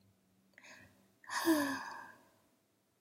Short Female Sigh
A short sigh (female voice). I did this one just for fun, for anyone's use.
air, breath, breathe, breathing, female, human, sigh